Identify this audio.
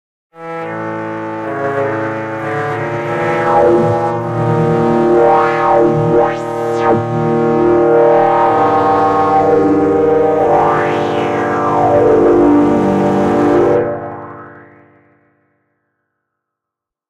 Weird synth chord
Synthesizer with heavy saturation and sweeping eq.
sweep, synthesizer